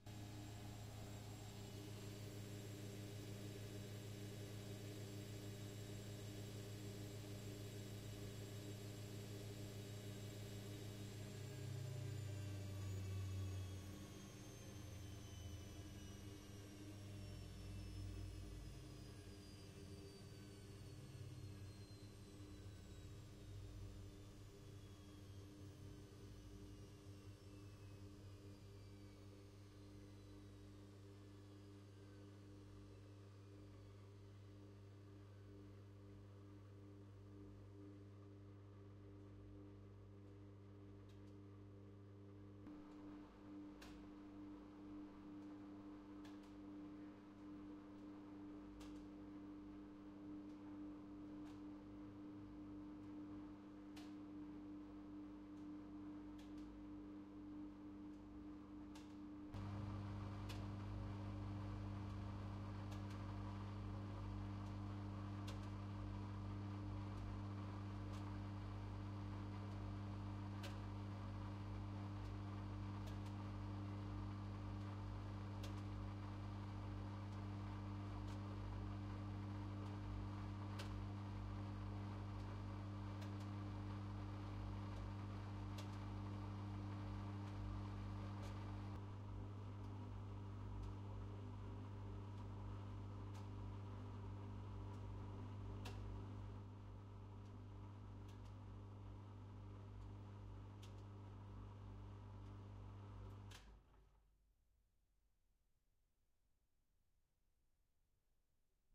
090530 03 machine wash various
Recording of wash machine from various location around